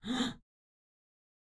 Suspiro Preocupacion
concern human sigh
concern, human, sigh